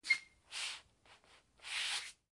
Ceramic Floor Rubber Shoe Slide 1 2
Bathroom, Ceramic, Design, Floor, Foley, Footstep, Indoor, Outdoor, Patio, Rubber, Shoe, Sneakers, Sound, Stone, Trainers